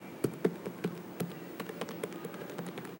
Sounds of ZX Spectrum keyboard.
arcade, buttons, game-sound, keyboard